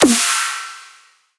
Xtrullor Snare 07 preclap
A free snare I made for free use. Have fun!